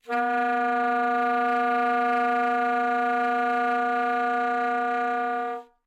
One of several multiphonic sounds from the alto sax of Howie Smith.
smith, multiphonic, sax, howie